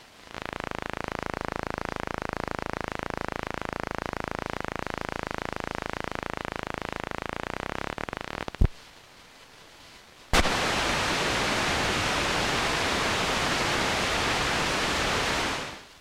Electro-magnetic interference from the Colorino Talking Color Identifier and Light Probe when held near the internal Ferrite antenna on the back right of a 13-year-old boombox near the bottom of the AM broadcast band. You first hear the device inactive being brought near the radio with a bit of computer EMI in the background. This gives a low buzz of stacato clicks. At about 00:08 I remove one of the tripple-A batteries and the pulse-train quickens, rising in pitch briefly, then diesels out to a few clicks and you hear a thump. At 00:10 the battery is reconnected and you hear the white noise that means the device is in standby, which usually happens if you've just used it or inserted the batteries.